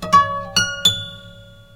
guitar.steel.strings.above.nut
guitar, steel strings picked above nut, weird tuning
musical-instruments, guitar